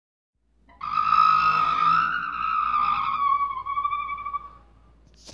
Creepy sound 2* , by FURRY
This sound was created when washing the window :D
Horror, Creepy, Scary